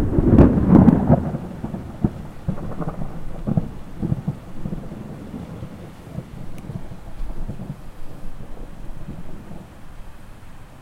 Frightening sound of lightning.